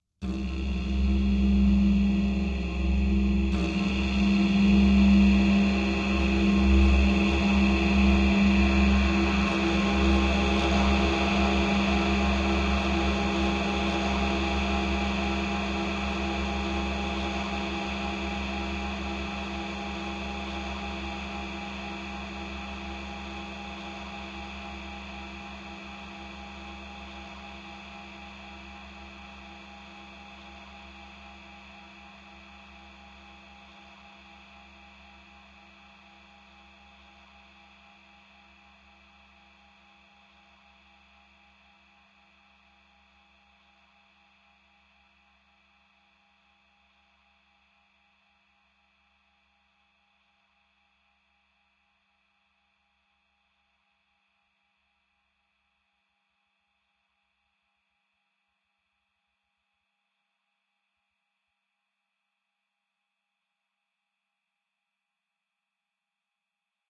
A heavily effected didgeridoo sample, long fade out, please crop at will.